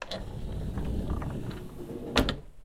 sliding wooden door close mic follow door scrape slow mic top wheels 2
a wooden sliding door being opened
door, shut, wooden, wheels, sliding, open, metalic, close, scrape